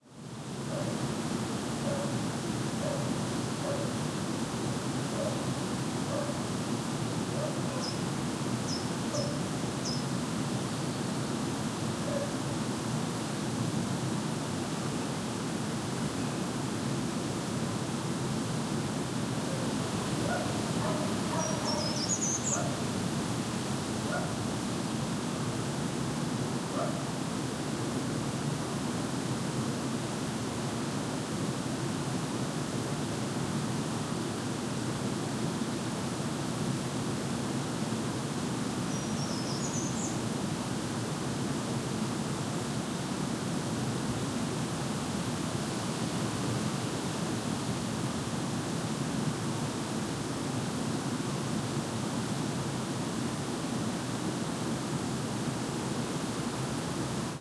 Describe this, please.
Air Spring Montalegre ORTF
Light wind and occasional birds not very close.
Recorded with Sound Devices and Rodes;
BGs, outdoors, nature